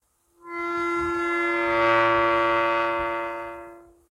Metal Creaking
creaky, creak, metal, gate